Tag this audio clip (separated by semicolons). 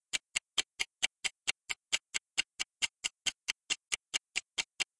Timer; Tick; Explosive-Paste; Tock; Movie; Time; Paste; Game; Explosive; Ticking; Bomb; Tick-Tock; Ticks; Film